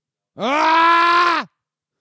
Bram screams AAH!